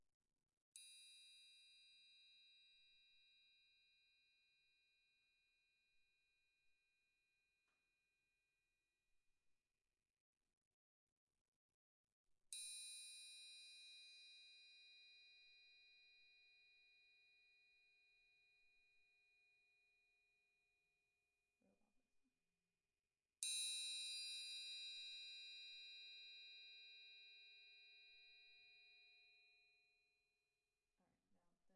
Single Triangle Hits soft medium loud
Soft, medium, and loud single hits on a triangle
loud, ping, soft